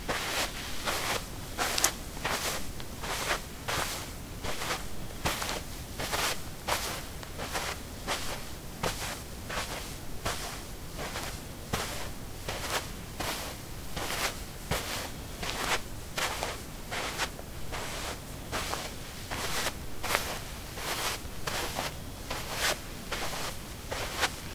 Walking on carpet: squeaky sport shoes. Recorded with a ZOOM H2 in a basement of a house, normalized with Audacity.